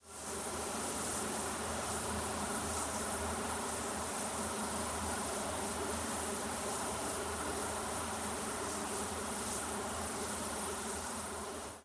radio signals, space
Sci-fi sounding radio interference. HVAC recording manipulated with Avid sci-fi, lo-fi and Space.
interference, sci-fi